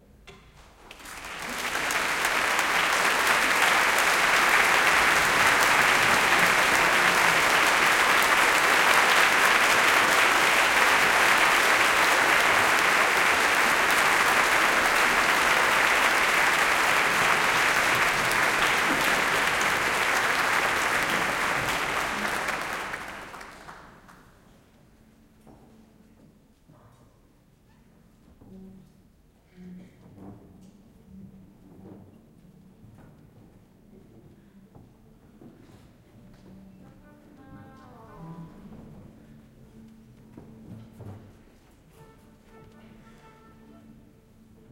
Applause in the Concert // Aplodit konsertissa
Applause from Symphony Orchestra Concert
Sinfoniakonsertti. Suosionosoitukset, taputukset kappaleen loputtua, kesto n. 20''. Hiukan soitinten ääniä lopussa.
Paikka/Place: Suomi / Finland / Helsinki
Aika/Date: 12.09.1990